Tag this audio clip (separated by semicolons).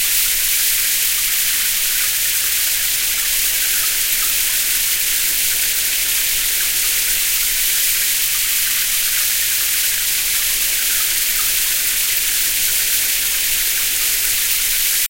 bath; bathroom; shower; water